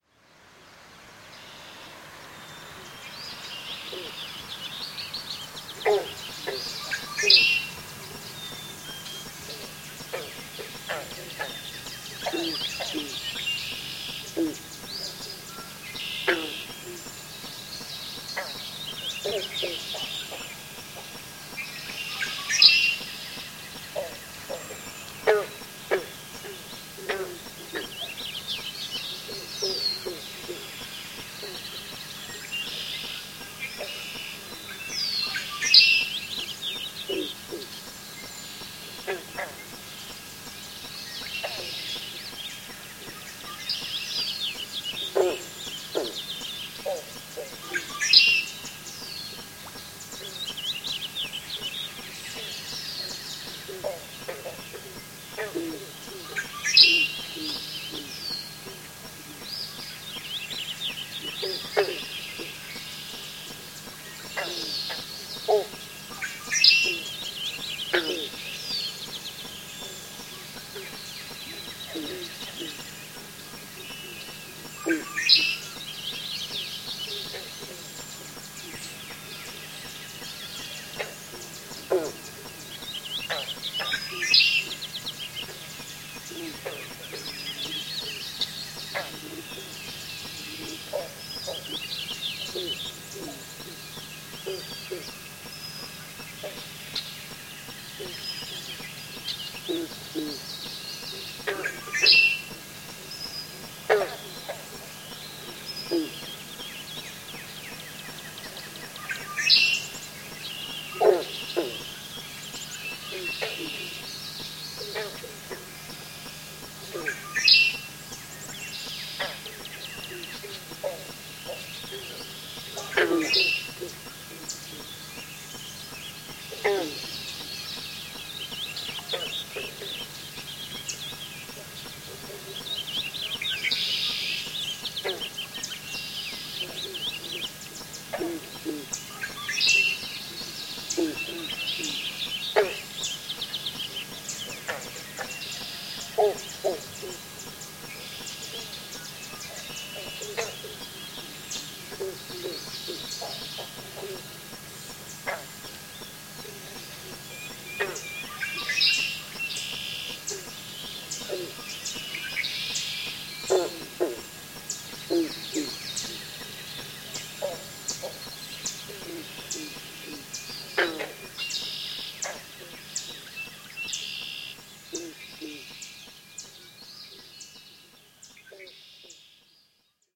some swampy sounds
birds, frogs, insects, nature, wetlands